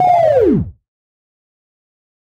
Attack Zound-01
A short electronic sound effect consisting of a pitchbend that goes
down in frequency. This sound was created using the Waldorf Attack VSTi within Cubase SX.
electronic, soundeffect